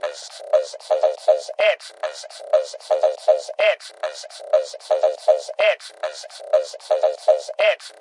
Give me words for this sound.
Blue BoingBitsBeat
My own vocals morphed in Cubase using vst's
Edited into loops or hits : electro, jumpy, loop, beat
loop, electro, boingbizz, electronic, morphed, vocal, vocoder, processed, synth